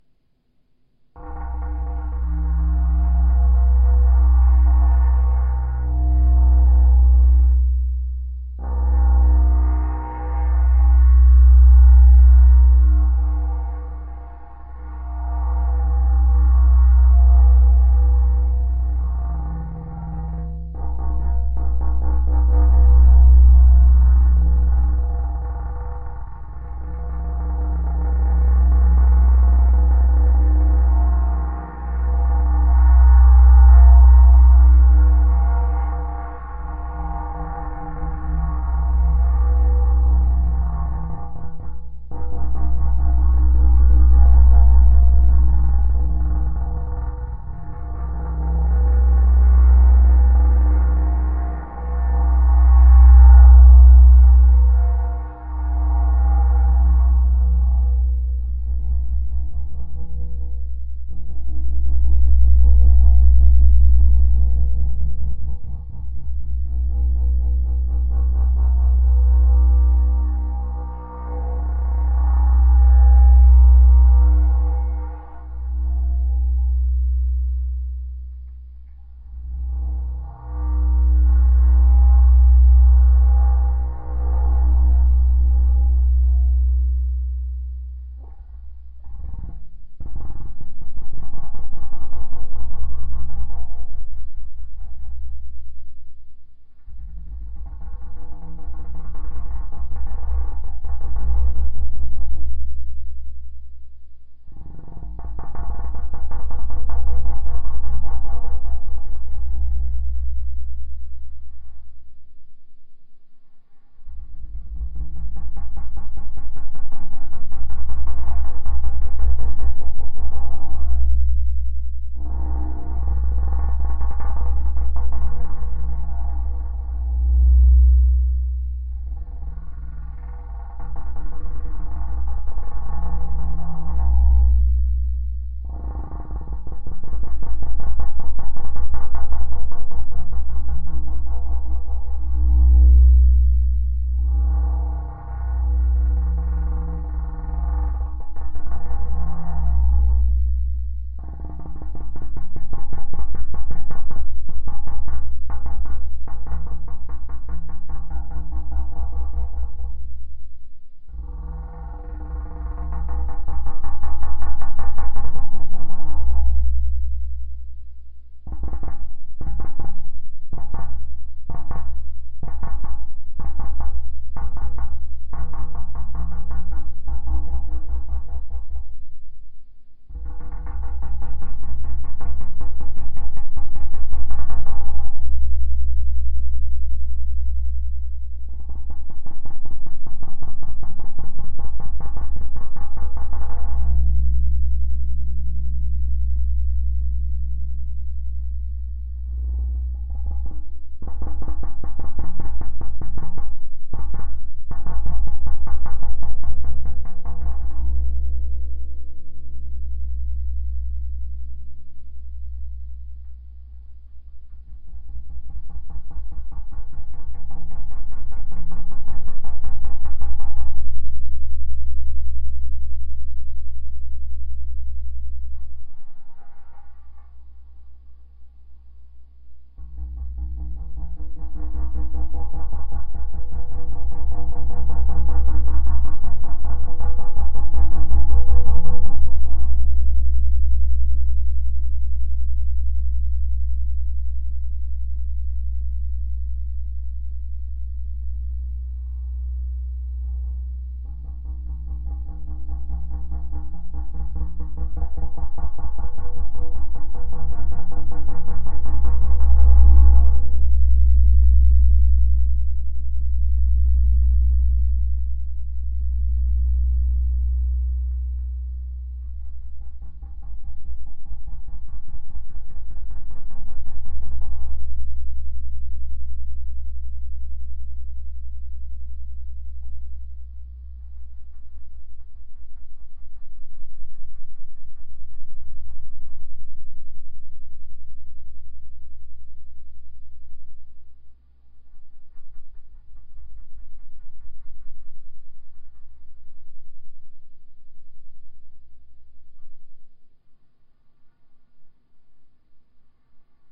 Rugoso LA 2
bohemia glass glasses wine flute violin jangle tinkle clank cling clang clink chink ring
ring, cling, clang, violin, bohemia, clink, clank, jangle, tinkle, wine, glasses, flute, glass, chink